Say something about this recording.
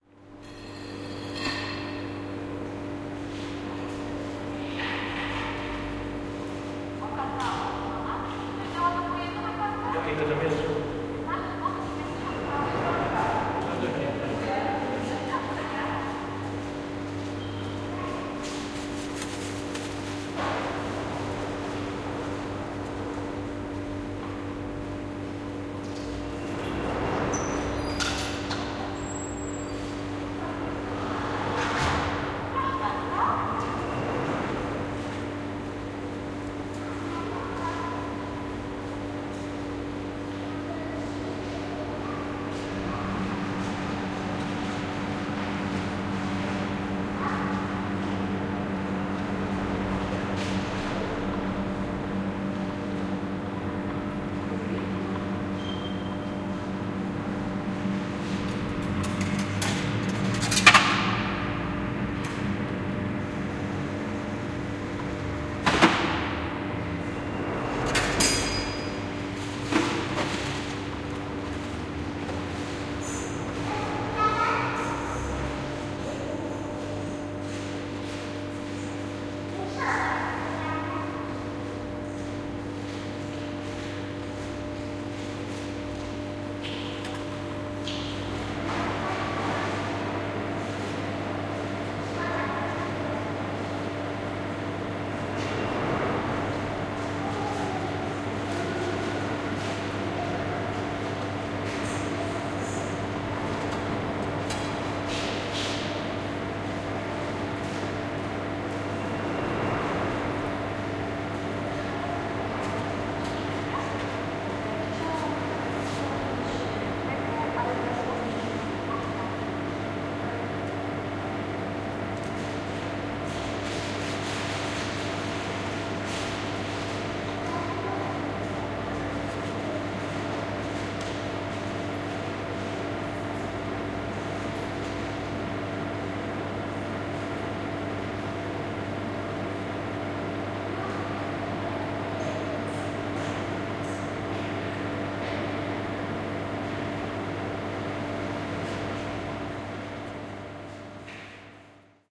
sweets machine on bus station os.sobieskiego 06.04.2016
06.04.2016: soundwalk with my student (exercise during Ethnological Workshop: Anthropology of Sound). The Os. Sobieskiego in Poznań. Sound of the sweets-machine inside The Bus Station building. Recordist: Anna Weronika Czerwińska.